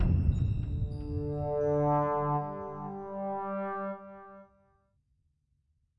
alien-impact r3 session
Sound effect for alien impact made with Ardour3 and Phasex on Debian GNU/Linux